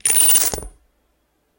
0015 Bottom Studs
Recordings of the Alexander Wang luxury handbag called the Rocco. Bottom Studs